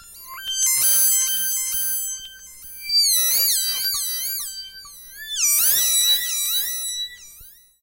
QUILTY - Bonechillin' Pads 008
I forgot about these samples, and they were just sitting in the FTP until one day I found them. I erased the hard copies long ago, so I can't describe them... I suppose, as their titles say, they are pads.